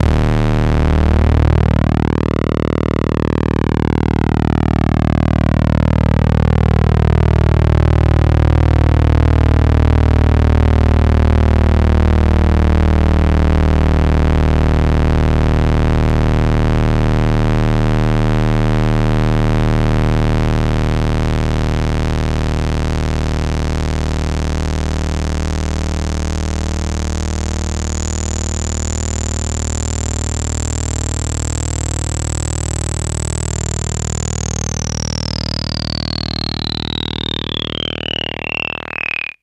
Sounds from an analog sound device called 'The Benjolin' a DIY project by Rob Hordijk and Joker Nies. Sometimes recorded in addition with effects coming from a Korg Kaoss Pad.
sound, hardware, circuit, analog, noise, electronic, synth, benjolin